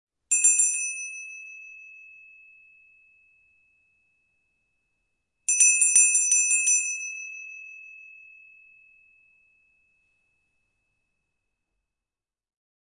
SMALL BELL - 1
Sound of a small bell. Sound recorded with a ZOOM H4N Pro.
Son d’une petite clochette. Son enregistré avec un ZOOM H4N Pro.
ringing, clanging, small-bell